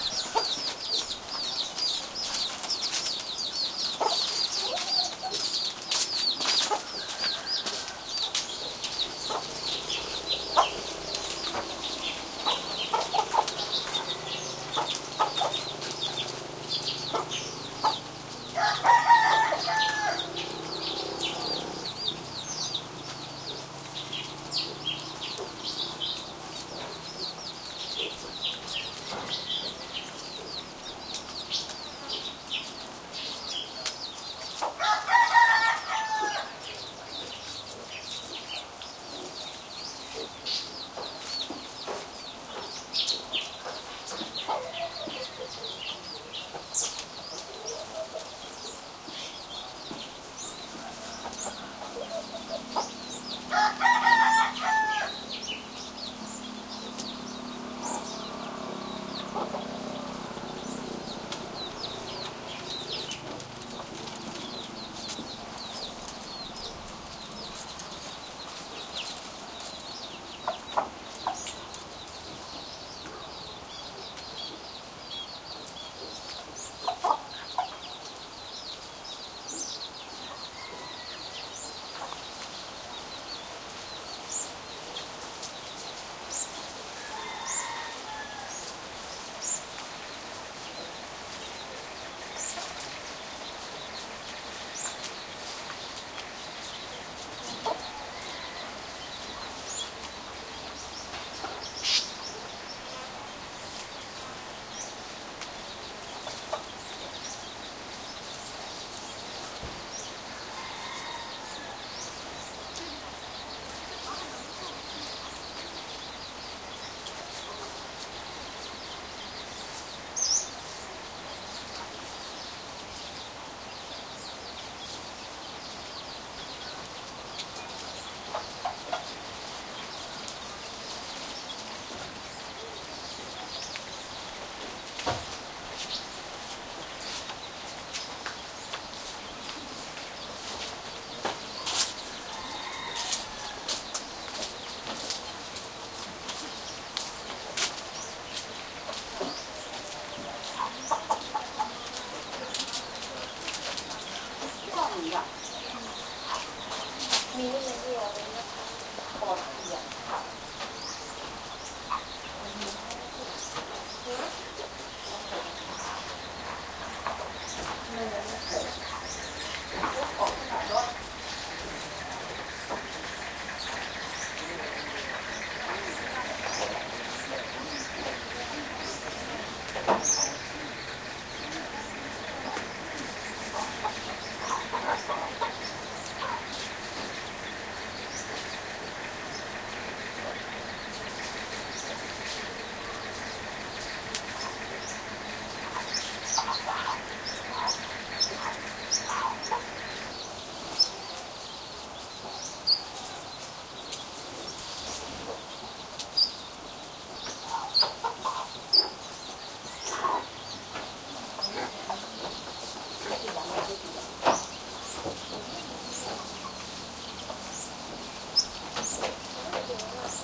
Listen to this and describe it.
Thailand rural village among bamboo huts nearby birds, occasional bg vehicle
birds, field-recording, rural, Thailand, village